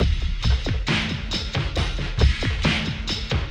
Deep Beat 136BPM Variation#2
This is another variation of the Deep Beat I made using a slowed down Amen break layered with other drum beats I made in reason. The samples were layered using Presonus Studio One